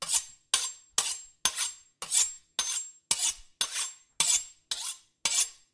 Rubbing two knives together to simulate sharpening a knife with a sharpening steel, slightly faster.Recorded with Rode NTG-2 mic into Zoom H4 recorder.
foley, kitchen, knife, ring, scrape, sharpen, sharpening, slice, steel